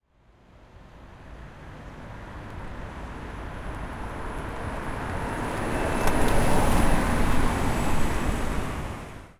nissan-leaf
An electric car (nissan leaf) driving by passing from left to right
EV, vehicle, leaf, nissan, car, driveby, electric, passing